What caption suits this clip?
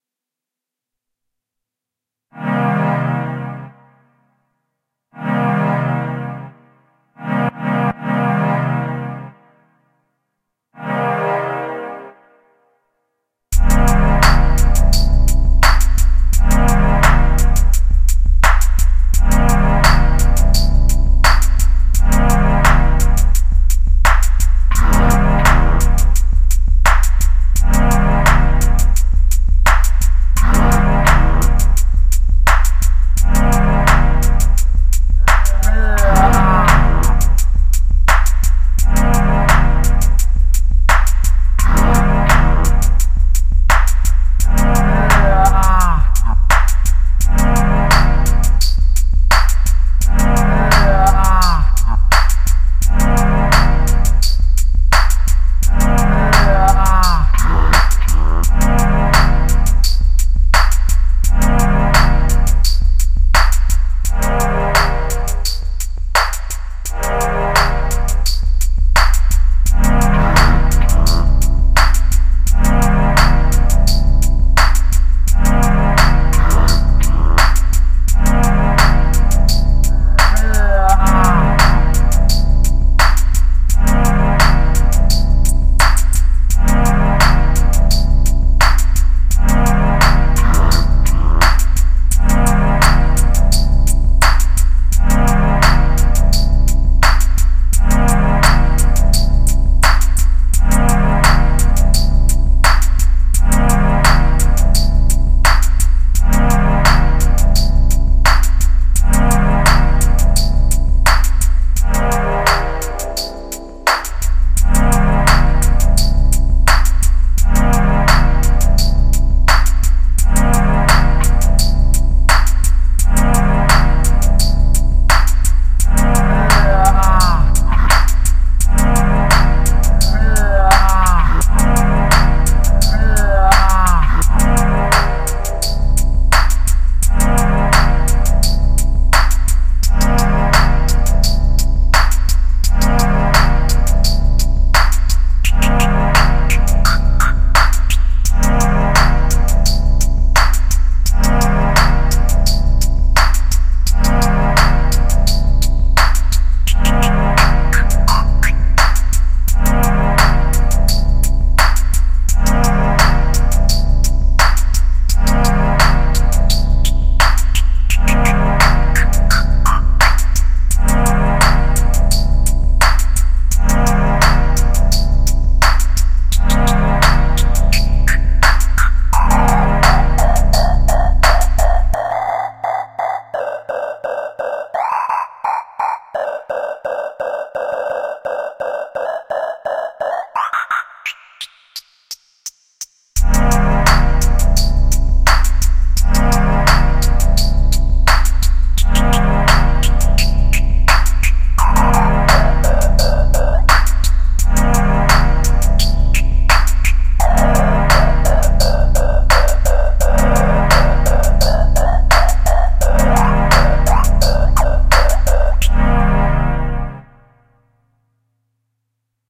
Synth ambiance
ambiance, ambience, ambient, atmosphere, cinematic, dark, drone, electro, electronic, pad, processed, sci-fi, soundscape, synth